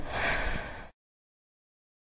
You can hear the breath of a female. It has been recorded in a recording classroom at Pompeu Fabra University.
campus-upf, heavy, breathing, UPF-CS14, inhale, breath